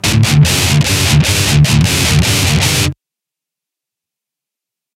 DIST GUIT 150BPM 6
Metal guitar loops none of them have been trimmed. they are all 440 A with the low E dropped to D all at 150BPM
DUST-BOWL-METAL-SHOW, 2-IN-THE-CHEST, REVEREND-BJ-MCBRIDE